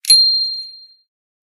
bicycle-bell 12
Just a sample pack of 3-4 different high-pitch bicycle bells being rung.